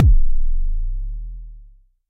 Mbase kick 01
i recorded this with my edirol FA101.
not normalized
not compressed
just natural jomox sounds.
enjoy !
bassdrum, analog, kick, jomox, bd